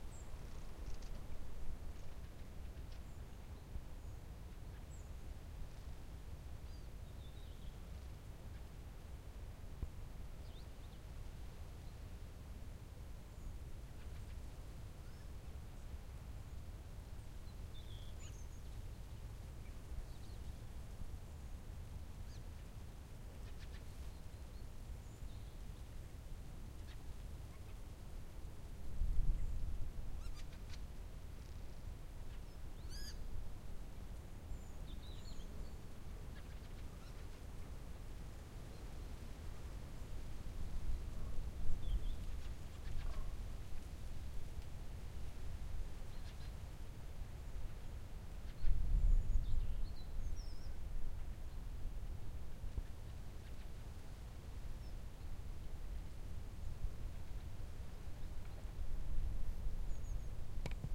Recording made in "de Amsterdamse Waterleiding Duinen" near Zandvoort in august 2011.